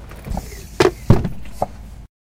Chair Hydraulic down
The hydraulic from an office chair.
chair, hydraulic